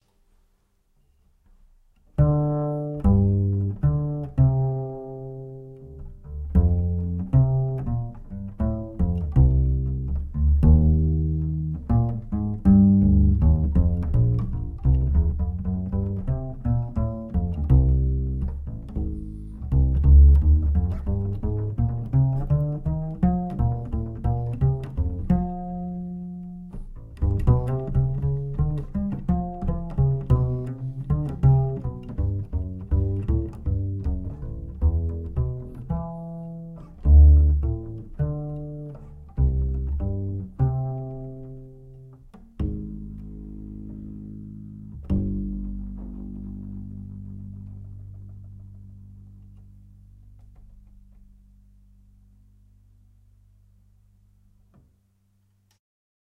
Acoustic bass test 2
Superlux S241/U3 small diaphragm condenser mic_Schertler UNICO II_Tascam RW4U_no editing and no effects
clamp, superlux, test, u3